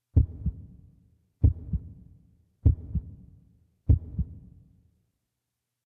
heart beatt sound made from my yamaha psr
beat, heart, heart-beat